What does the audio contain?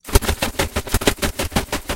Bat Wings (Fast)
Used my umbrella to create this sound effect. This is just simply a faster version of the other sound by 200%. Recorded with my Samson C03U microphone.